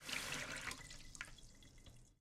Turning on a faucet. Full stream.